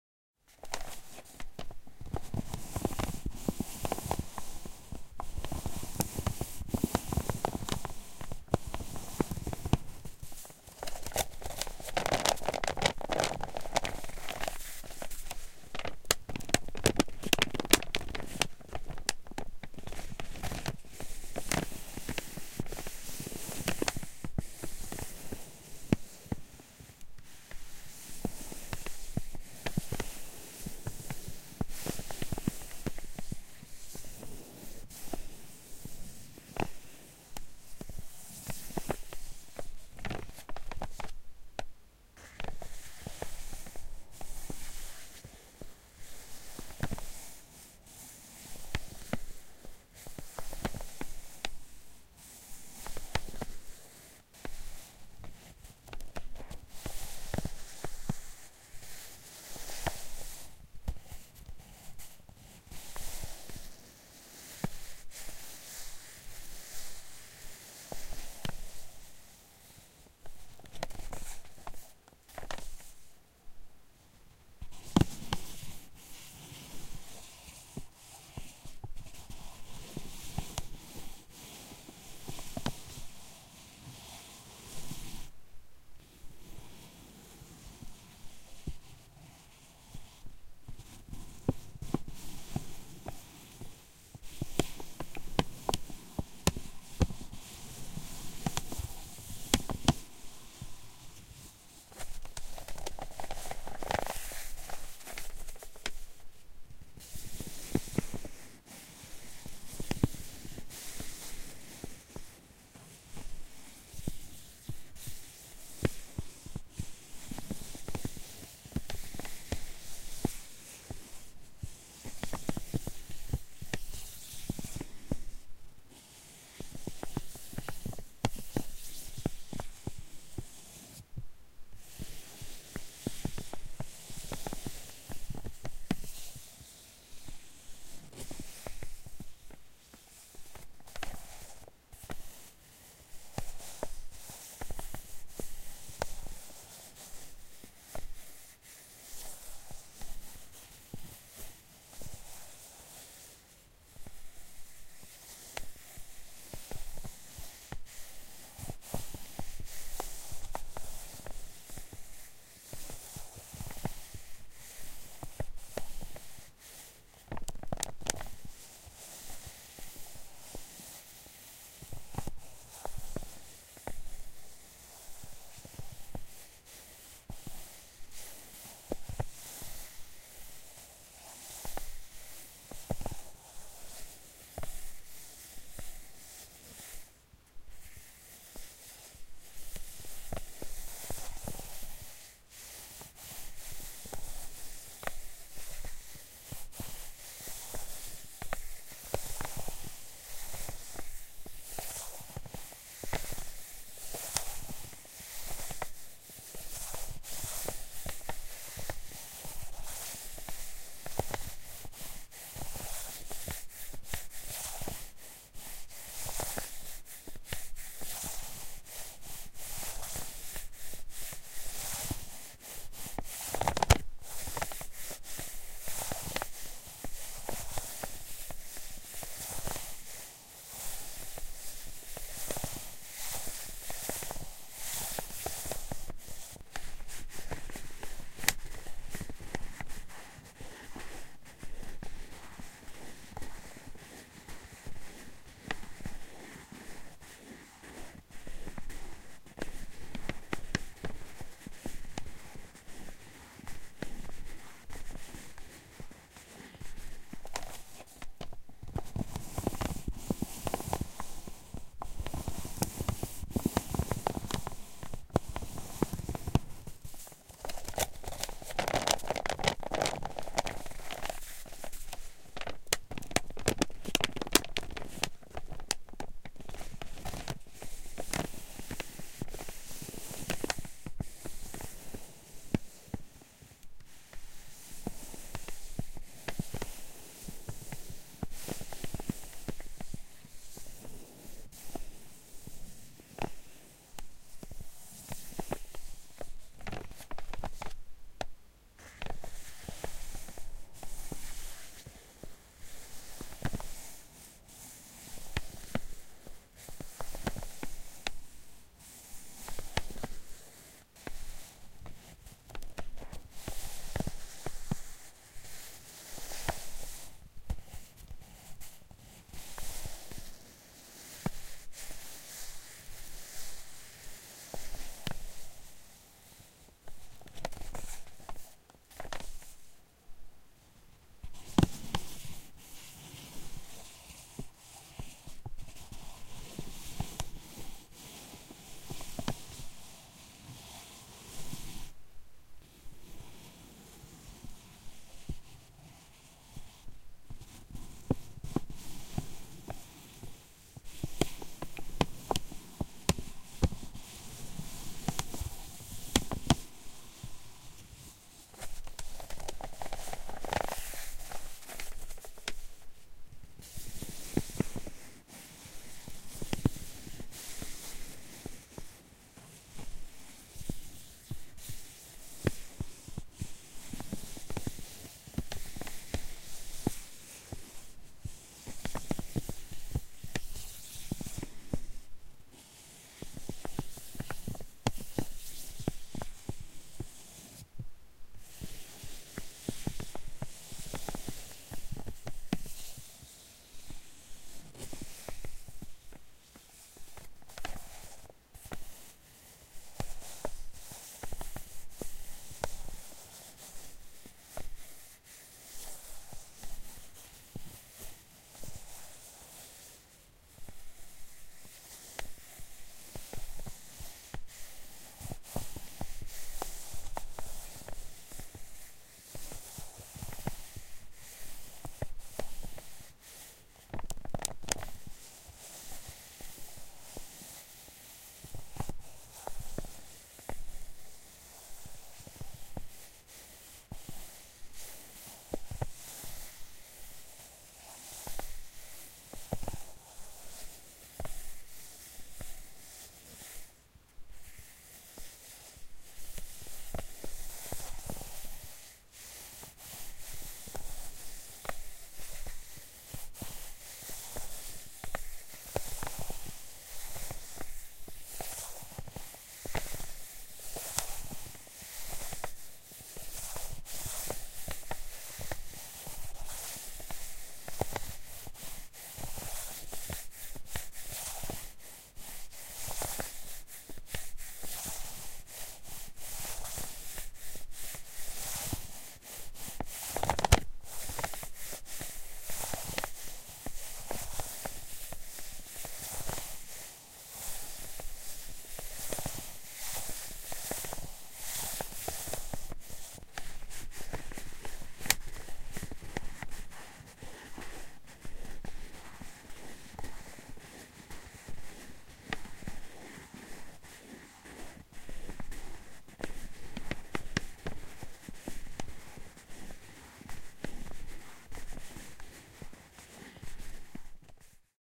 Paper bag. Recorded with Behringer C4 and Focusrite Scarlett 2i2.
paper, wrapping, sound, bag